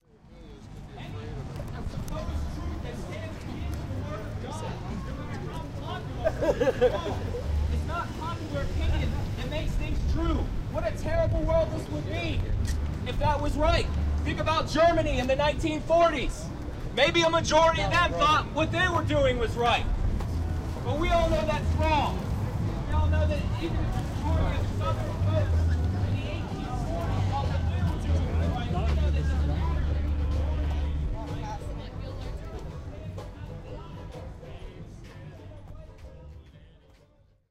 Street Noise w preacher
Street preacher in Richmond, Virginia. Mentions 1940s Germany, fades out into street band.
street, preacher, richmond